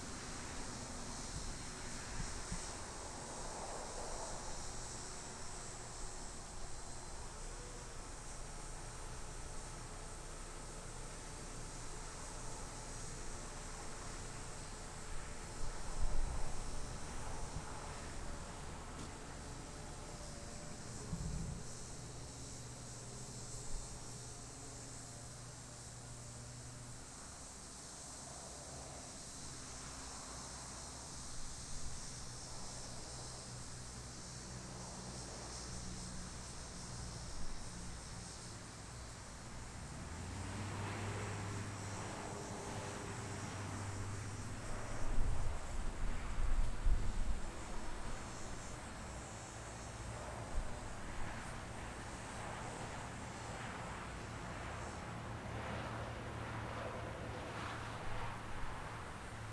The day I brought out the microphone, I should have brought the camera. I noticed a falcon or maybe it was an eagle, perched in a tree eating what looked like a mouse. I tried to coax the bird to make a noise but I couldn't so I just recorded the sounds of locusts or cicadas or whatever they call them in your part of the world.
ambient, insect, bug, noise